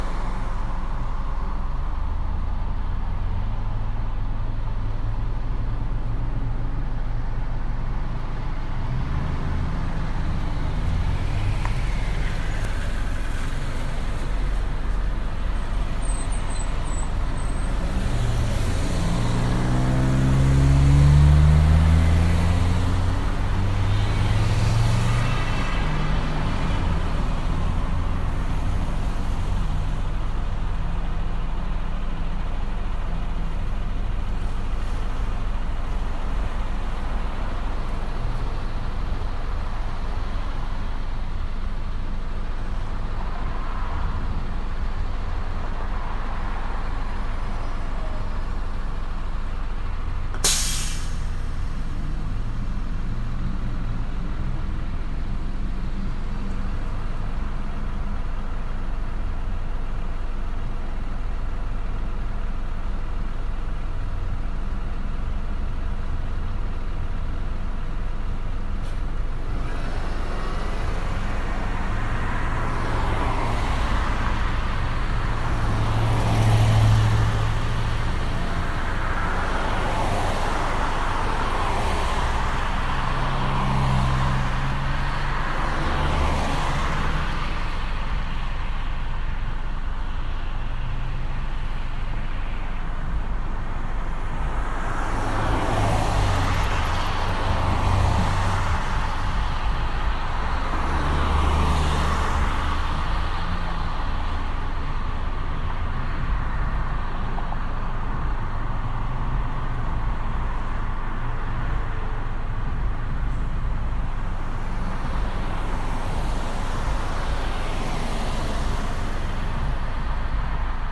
Traffic, Small Town
Sound of a intersection midday, traffic noises
ambience, city, field-recording, small-town, street, traffic